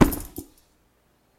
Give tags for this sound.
Hardware Handbag

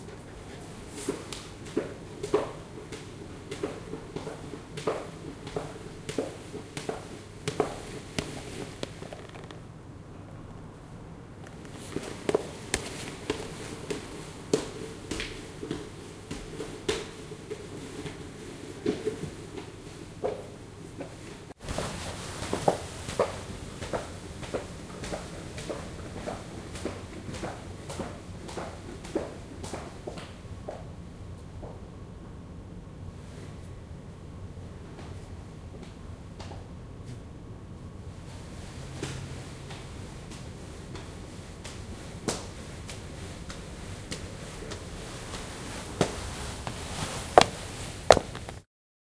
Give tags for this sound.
stairway feet walk footsteps fabric foley walking dress stairs

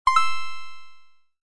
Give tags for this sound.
coin game item object pick-up

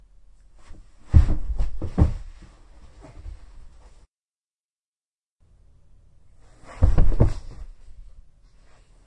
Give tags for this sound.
body fall fight

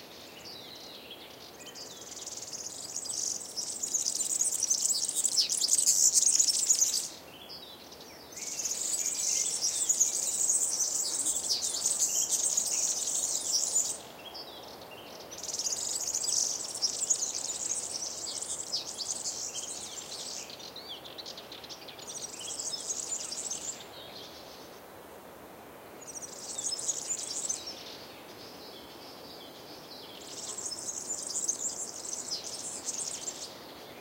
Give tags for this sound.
ambiance birds serin south-spain spring

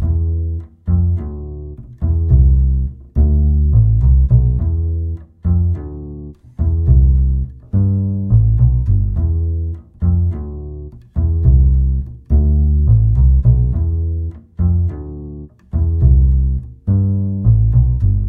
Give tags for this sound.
bass 105bpm riff guitar loop

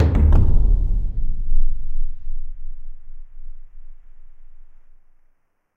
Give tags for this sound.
drums toms tribal

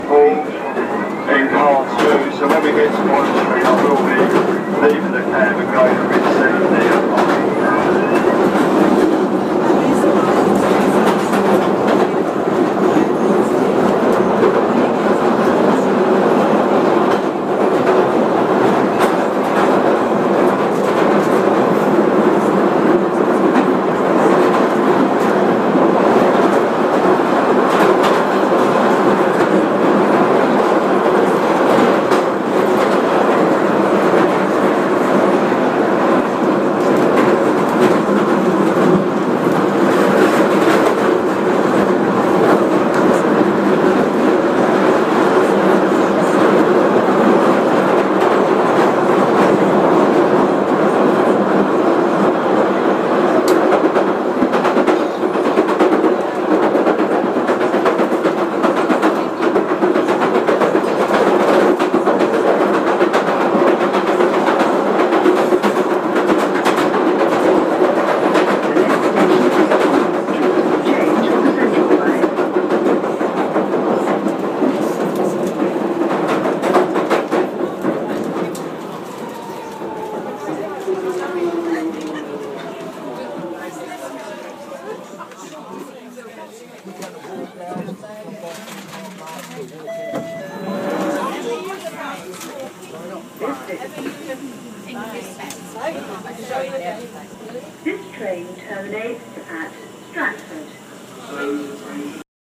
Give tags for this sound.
subway field-recording

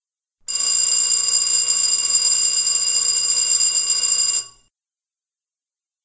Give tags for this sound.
ring bell work factory electric physical

